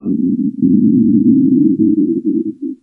Tummy Rumble
just an unintentional stomach growling while i was recording my voice LMAO
eating, field-recording, growl, grumble, rumbling